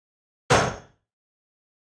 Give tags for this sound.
army gun gunshot military weapon

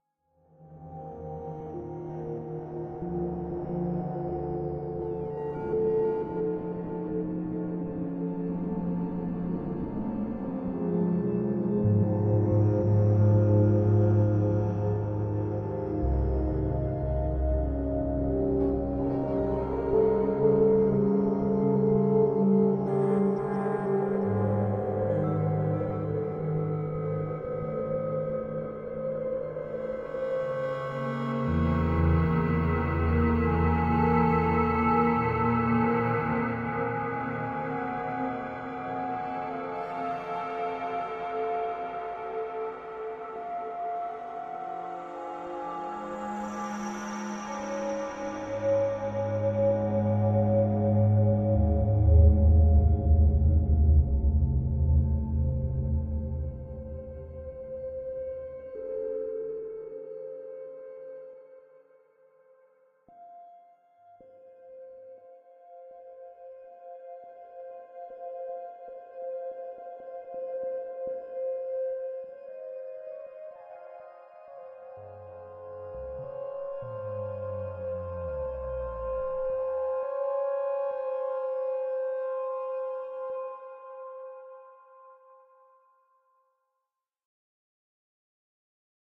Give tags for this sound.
dark electronic atmosphere music synth ambience electro cinematic sci-fi processed

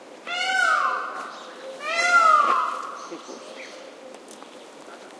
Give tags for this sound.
bird cry field-recording garden pavo-real peacock

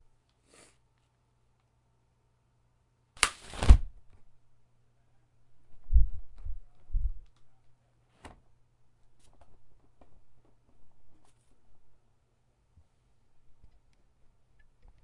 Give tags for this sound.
Umbrella Click Opening

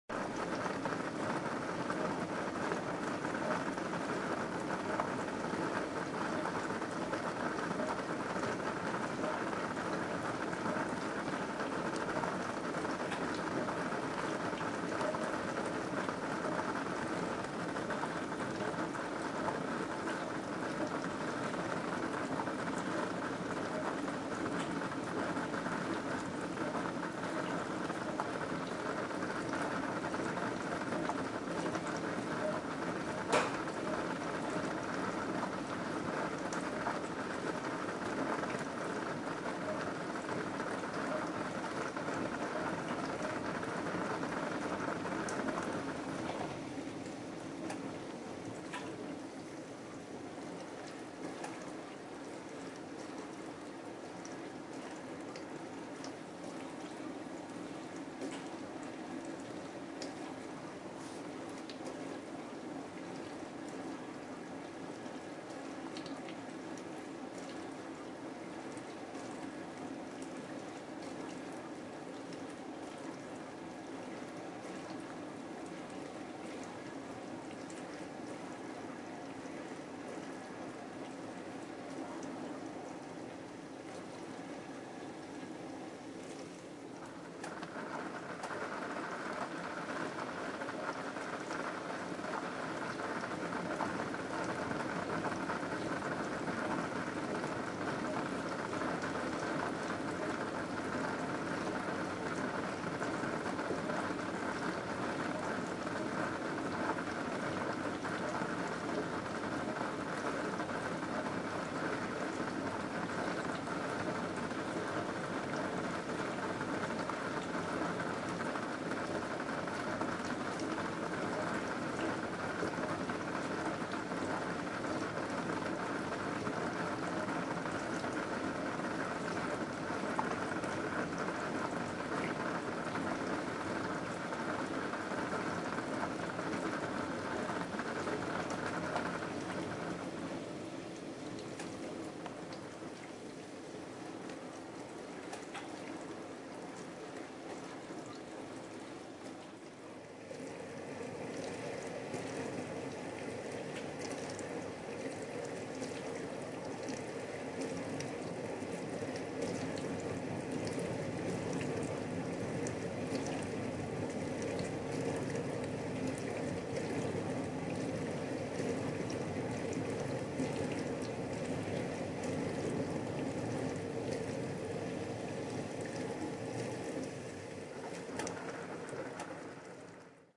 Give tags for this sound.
ambiance CanonLegria dishwasher kitchen sound working

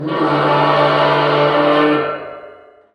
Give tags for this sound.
hands alert big gigantic ball cool impulse frontier design compact edit audio bizarre hand cup cd group echo impact contact industrial disc huge converters enormous evil dreamlike dream dark cell

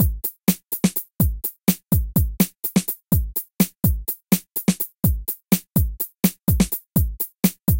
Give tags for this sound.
percs groovy perc percussion-loop percussive drumkit leaddrums drums

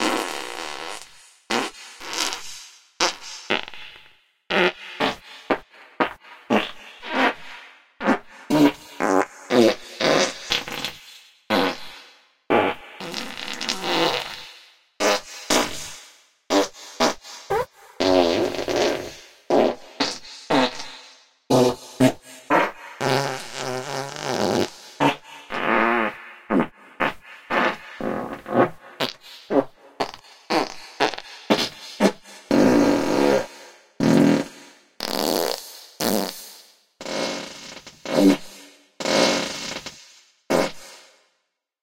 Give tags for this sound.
blowing velocidad Fart snelheid passing vitesse flatulence

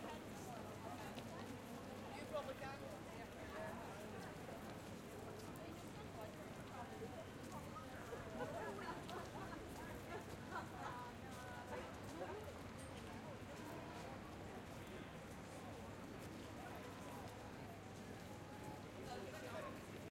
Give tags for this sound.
people field-recording crowd Glasgow city walla traffic Ambience H6n Zoom street

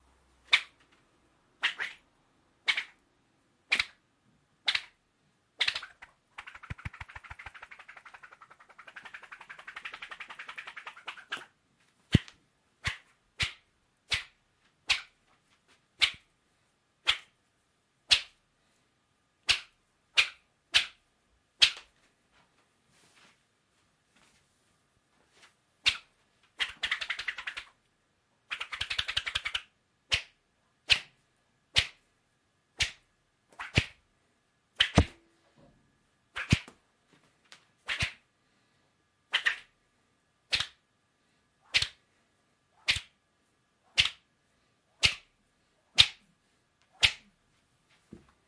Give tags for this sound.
devo whipitgood whipit

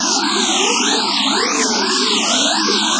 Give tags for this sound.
loop space

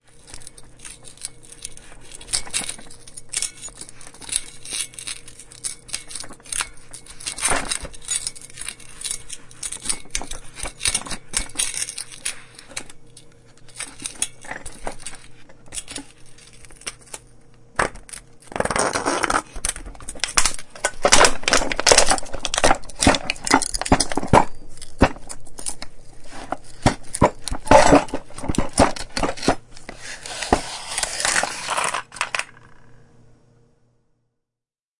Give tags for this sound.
stereo recording field concrete crush beer basement can toolbox noise

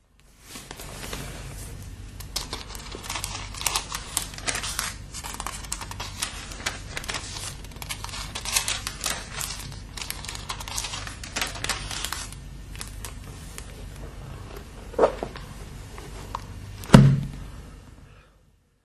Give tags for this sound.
turning-pages paper book

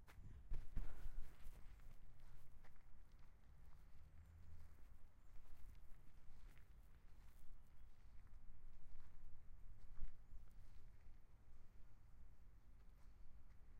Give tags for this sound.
pombos Parque-Serralves ulp-cam leaves natural-park wet-pathway

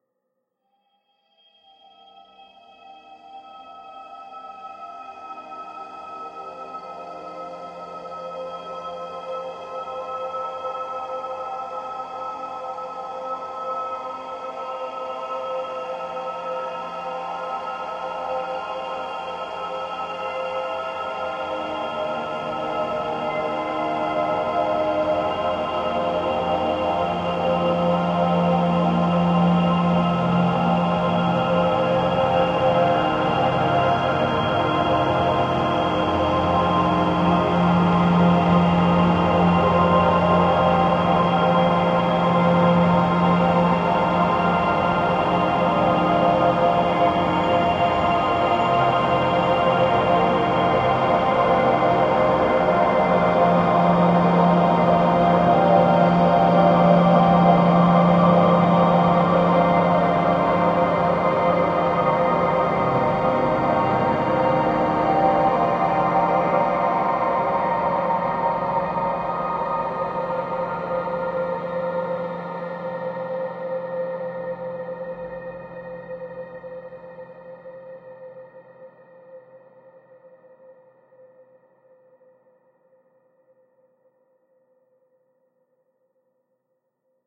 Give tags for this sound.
artificial drone pad ambient smooth evolving soundscape multisample dreamy

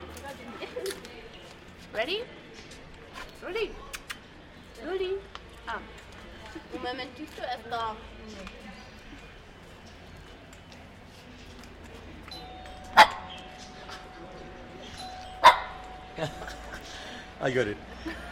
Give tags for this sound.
bell dog perro timbre

